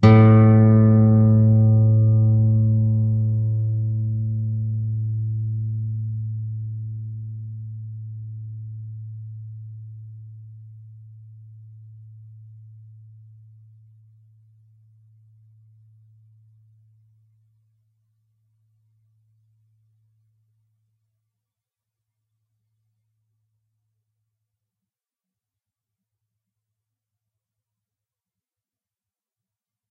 Clean A str pick
Single note picked A (5th) string. If there are any errors or faults that you can find, please tell me so I can fix it.
guitar, single-notes